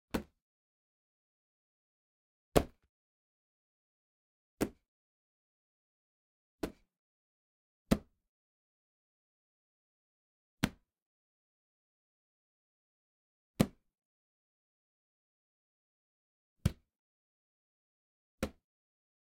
06 Football - Goalie Catch
The goalie catching the football.
Football, CZ, Goalie, Catch, Panska, Pansk, Sport, Czech